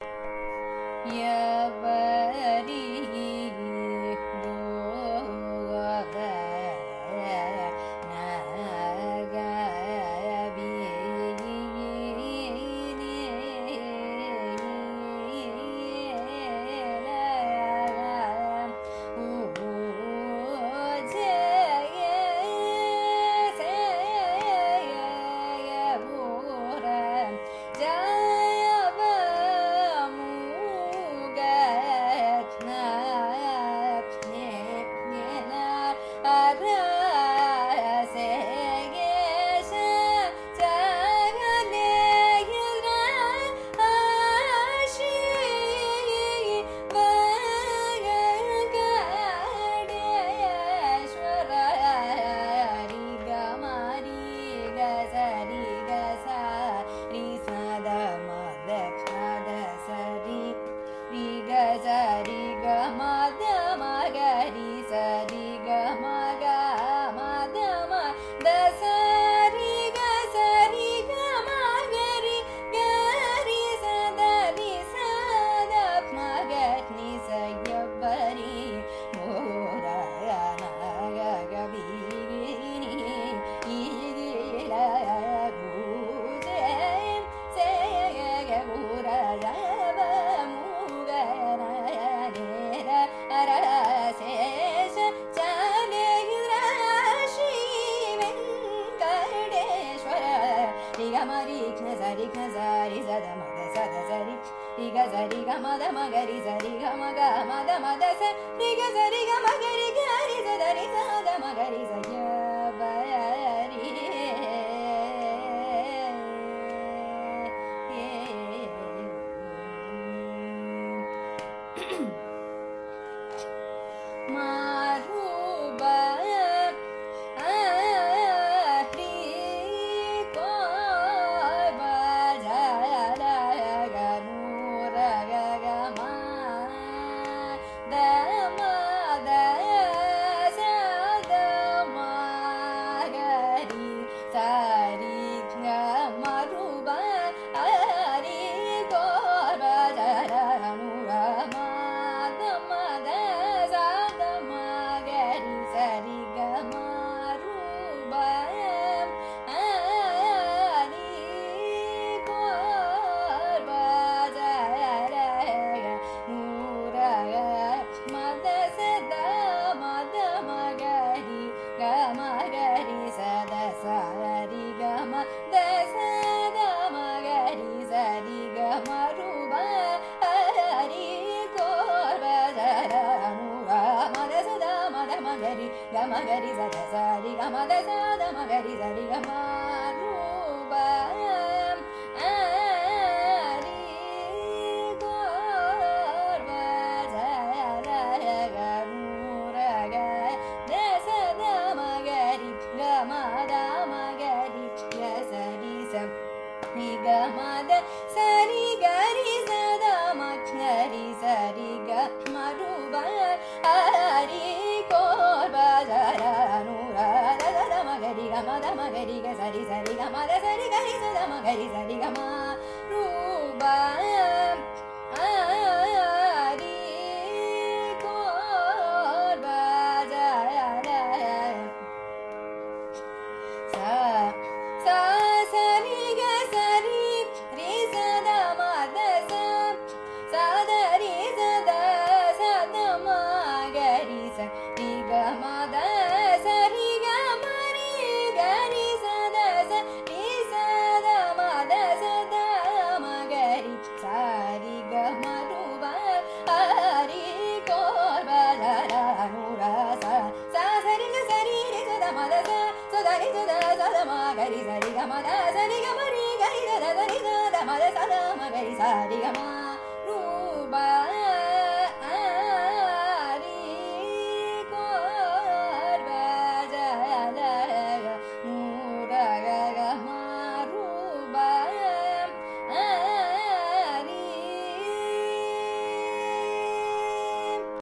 Carnatic varnam by Sreevidya in Abhogi raaga
Varnam is a compositional form of Carnatic music, rich in melodic nuances. This is a recording of a varnam, titled Evvari Bodhana Vini, composed by Patnam Subramania Iyer in Abhogi raaga, set to Adi taala. It is sung by Sreevidya, a young Carnatic vocalist from Chennai, India.
carnatic, carnatic-varnam-dataset, compmusic, iit-madras, music, varnam